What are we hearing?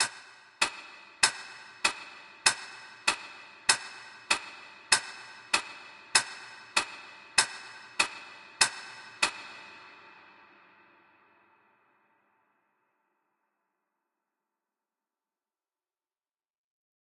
grandfather-clockdown
Cut up a recording of an old grandfather clock, removed the noisy whirring in between ticks, and added long dreamy reverb that grows a bit towards the end.